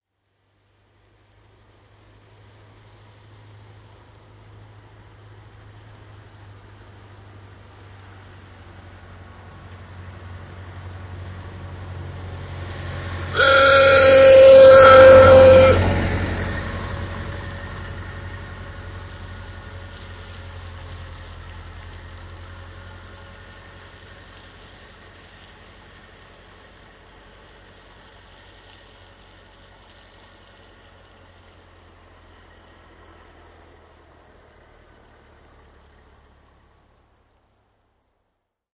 Henkilöauto, vanha, ohi, äänimerkki, auton torvi / An old car passing by, horn honking, Ford Tudor Sedan A/2629, a 1928 model
Ford Tudor Sedan A/2629, vm 1928. Lähestyy asfaltilla, rauhallinen ohiajo, pitkähkö äänimerkki kohdalla, doppler.
(34 hv, 3,28 l/cm3).
Paikka/Place: Suomi / Finland / Pusula
Aika/Date: 22.10.1981
Auto, Autoilu, Car-horn, Cars, Doppler, Field-Recording, Finland, Finnish-Broadcasting-Company, Motoring, Tehosteet, Yle, Yleisradio